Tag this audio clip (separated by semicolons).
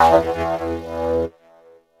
lead; multisample; overdriven; reaktor